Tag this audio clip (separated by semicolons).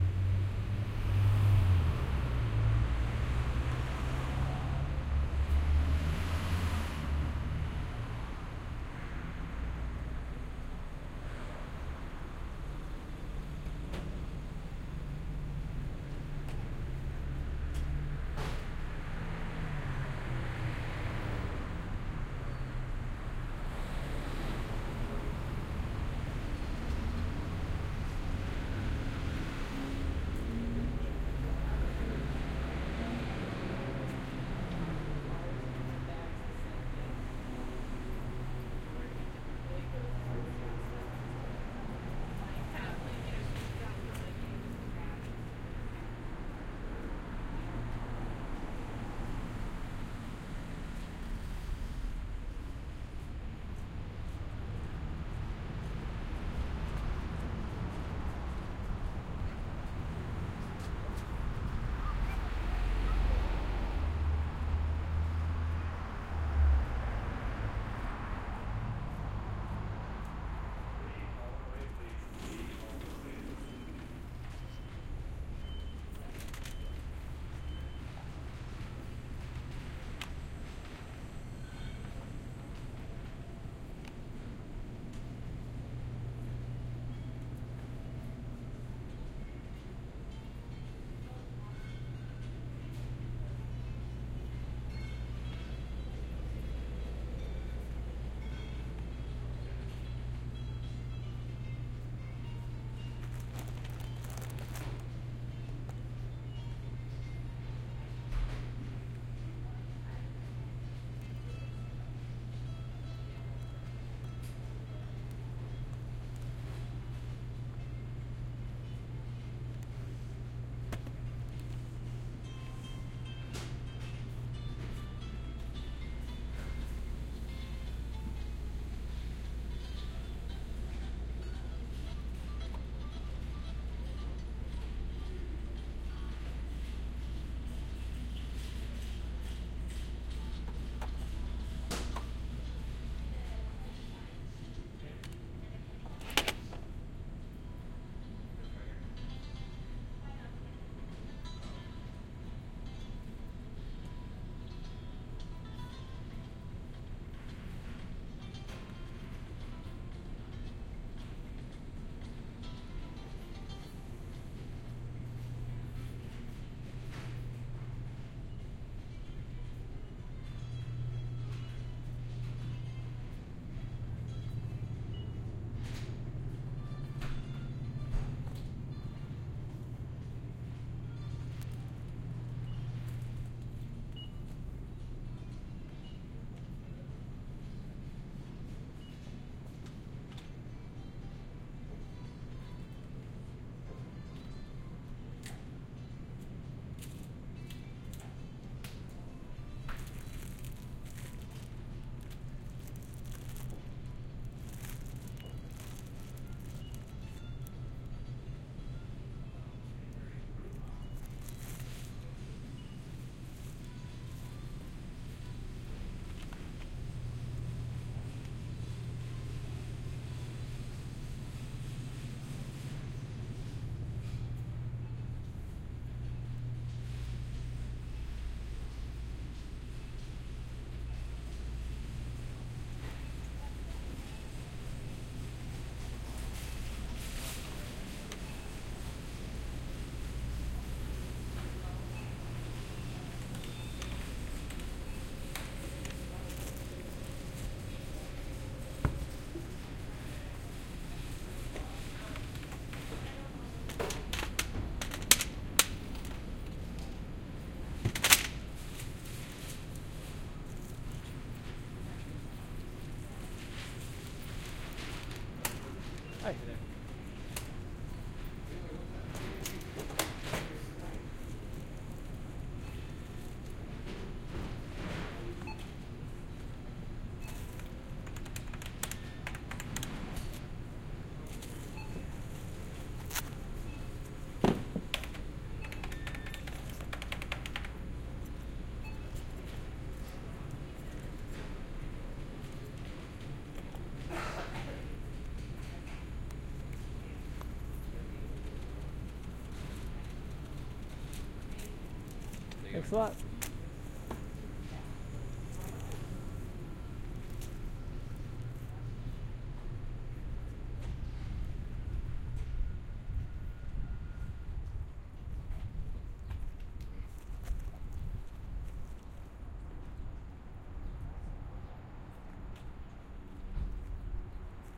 binaural; phonography; field-recording; store; grocery; inside; shopping; cart